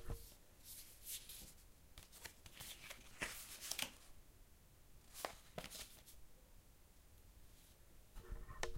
Opening a greetings card. In a hard surfaced area, close to mic.